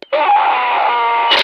"AAAAAAAH!"
Originally recorded for a scene in a Starship Troopers Half-Life map that was never released. These are supposed to be soldiers talking to eachother after first landing on the surface of the bug planet.
The voice actor is myself (Josh Polito).
movie, combat, acting, voice-acting, radio, conversation, aliens, war, agony, science-fiction, troopers, bugs, scream, military, film, fighting, pain, starship, space, death, voice, soldier, speech, yell